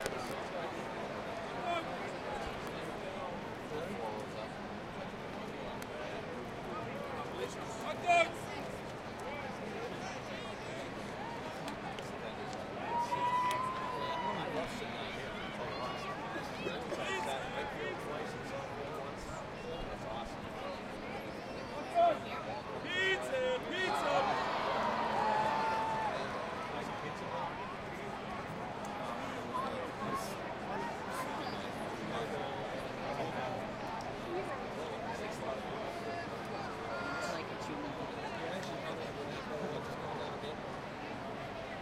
Fenway Park Sep 19 2010
At a Boston Red Sox game, Fenway Park, 19 September 2010. Pizza and hot dog vendors. People in the crowd talking and cheering.